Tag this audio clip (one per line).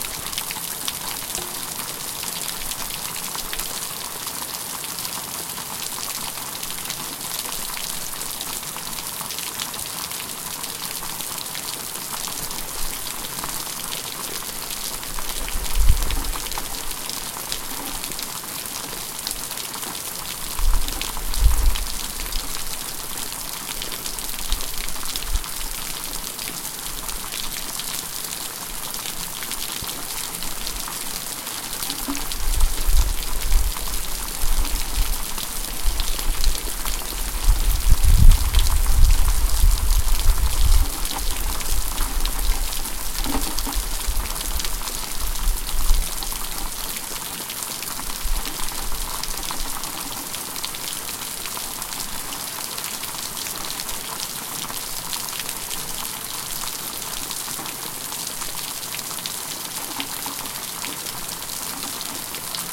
walla
porch
hard
patio
house
ambient
exterior
rain
rainfall